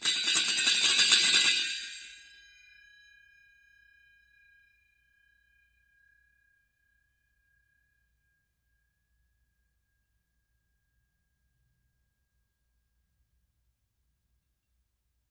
Bwana Kumala Ceng-Ceng 00
University of North Texas Gamelan Bwana Kumala Ceng-Ceng recording 0. Recorded in 2006.
bali, percussion, gamelan